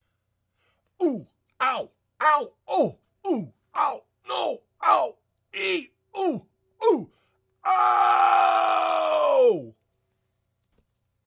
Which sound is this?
Ooooh Owww mixdown
Just did a video where a man was rolling down a hill hitting rocks and bumps along the way.
I recorded this in my audio studio with a simple large diaphragm condenser mic dry. It's supposed to sound a bit corny!
Oooh, Male, Owww, no, owwww